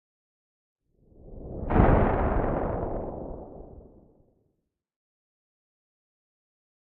Synthesized using a Korg microKorg